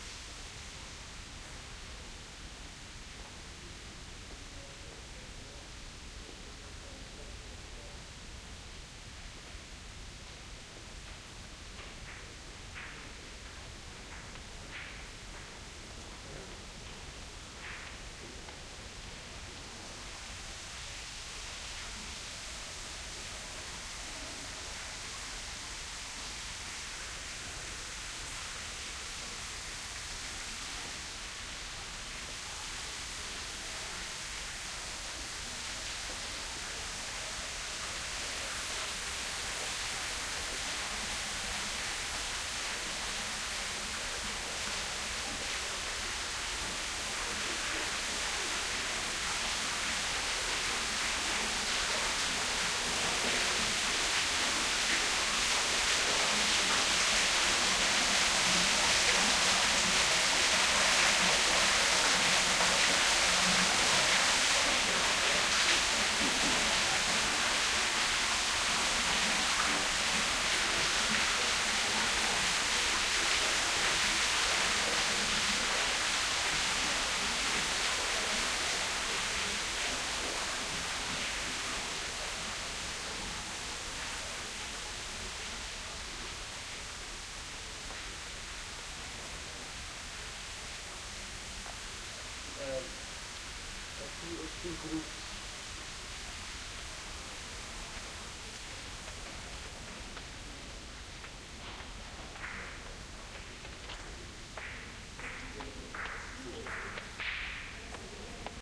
waterspout, small city square Lisbon
Waterspout in a cistern, I am moving towards the splashing and walking away again. Small city square in Lisbon, summer 1984. No traffic noise.